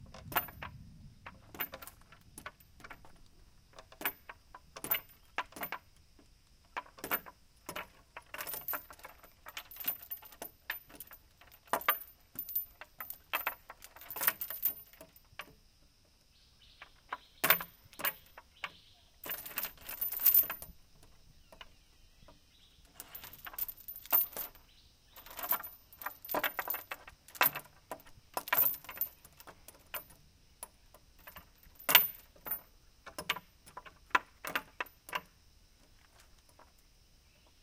This was recorded using Zoom H6 XY configured microphones with 90-degree directionality on both sides of the microphones. No camera stand nor microphone stand is attached to it.
It was recorded in a rural villa in Pahang (Malaysia), sort of on top of the mountain and away from the busy roads.